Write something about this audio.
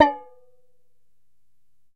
Striking an empty can of peanuts.